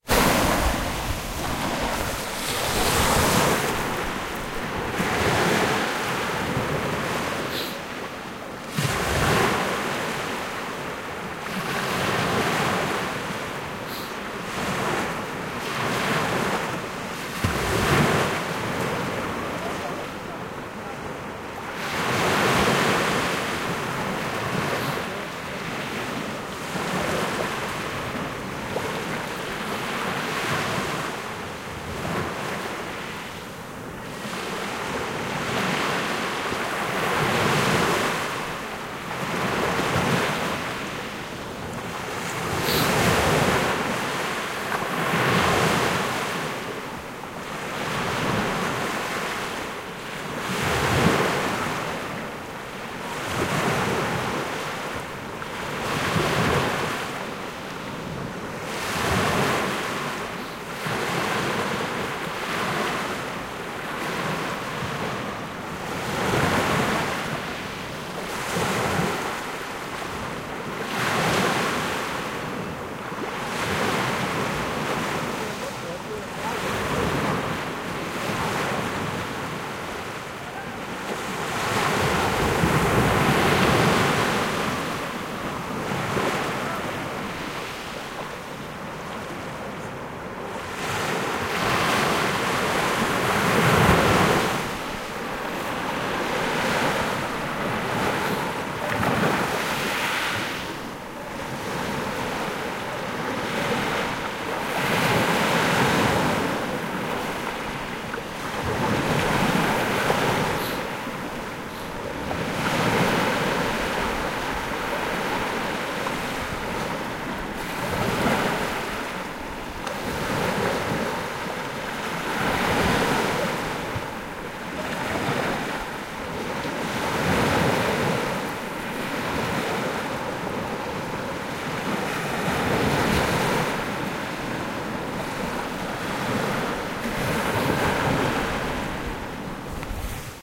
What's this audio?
Sea Waves on Beach 02
Sea waves lapping on to sand & pebble beach. Recorded 1st September 2017 on the North Landing Beach, Flamborough, UK. Exact same location that was used in the final scene of the movie 'Dads Army'.
Recorded using a Sanyo XPS01m
Beach, crashing, lapping, Ocean, Pebbles, Sand, Sea, Water, Waves